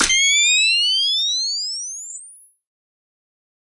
A Old camera flash whine recorded with a zoom H6
camera, flash, Old, OWI, photo, photography, vintage, whine